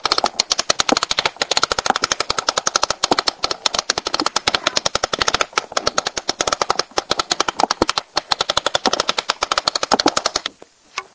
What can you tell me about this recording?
Motor Old
I think this is a toy, but it sounds a lot like an old motor.
gear, old, toy, plastic, motor